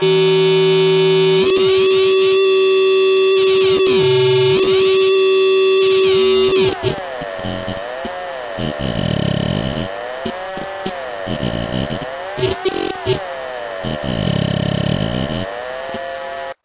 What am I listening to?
This is a strange one. Not even sure what to call it or how to describe it. Plenty of aliasing and distortion. Some interesting sounds.
The headphones output from the monotron was fed into the mic input on my laptop soundcard. The sound was frequency split with the lower frequencies triggering a Tracker (free VST effect from mda @ smartelectronix, tuned as a suboscillator).
I think for this one also the higher frequencies were fed to Saro (a free VST amp sim by antti @ smartelectronix).